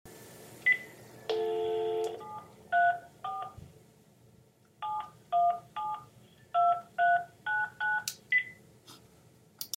this was me dialing my cell phone Number on a Panasonic cordless phone and hanging up. So it's beep, dial tone, number dial and beep.